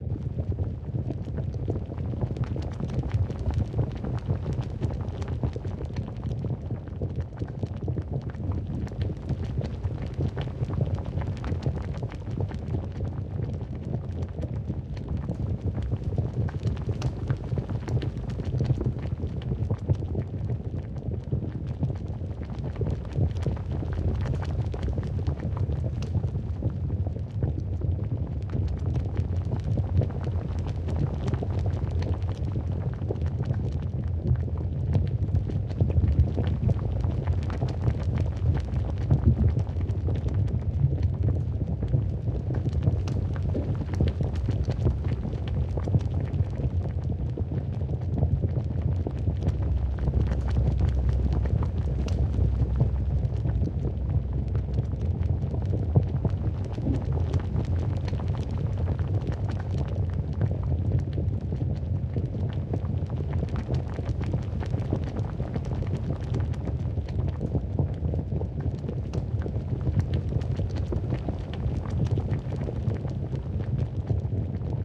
Lava loop
Heavy boiling, bubbling Lava.
Recorded using a heavy concoction of corn syrup, flour, and water over a gas stove.
boiling, cave, hot, Lava, bubbling, boil, fire